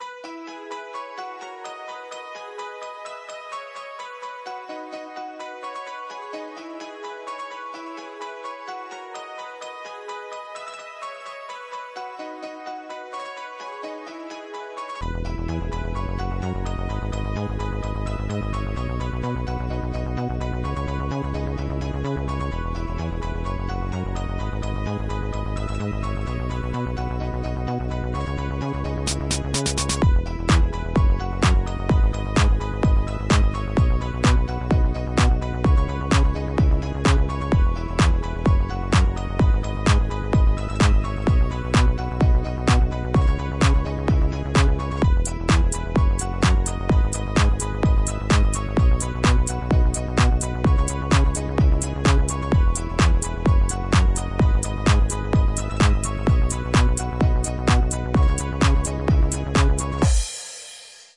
Loopable Track for Videos and Podcasts (128 BPM)
128 BPM background music for videos, can be extended.
animation, background, electronic, game, loop, loopable, music, piano, podcast, production, synth, track, video